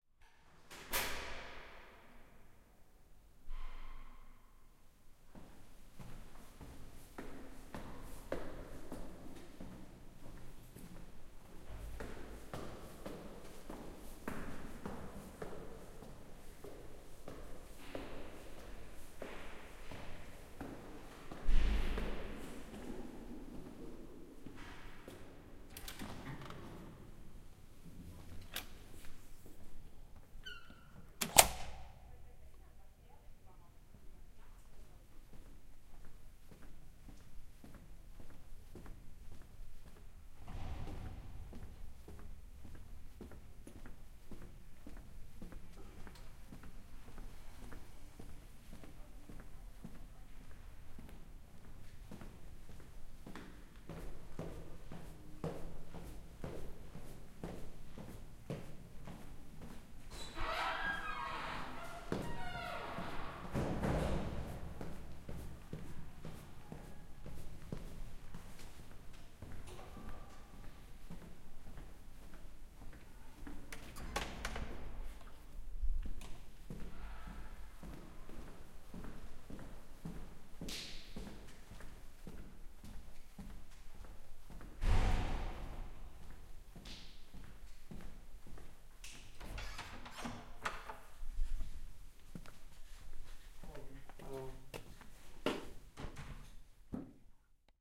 120120 walking through building
Walking through a school building with stairs,staircases and corridors of different size, opening connection doors. Zoom H4n
searching, stairs, steps, footsteps, alone, hallway, criminal-story, staircase, echo, corridor, stairway, walking, hall, building, secret, loneliness